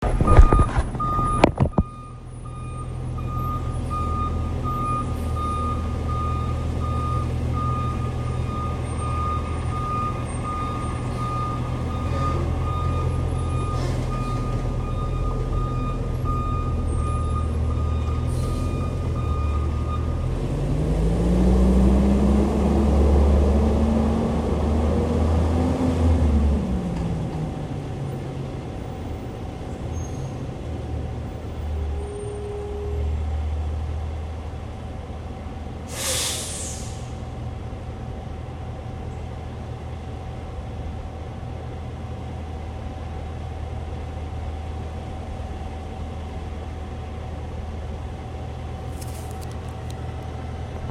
Truck Backing Up
auto, Truck, traffic, cars